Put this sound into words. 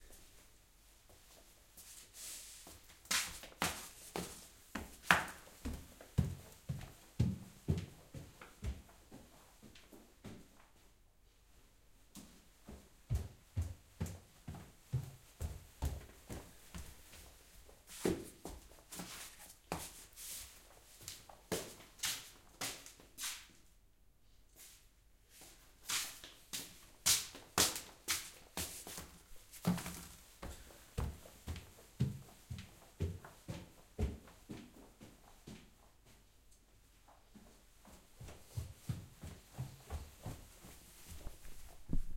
Walking in slippers on floor
walking, footsteps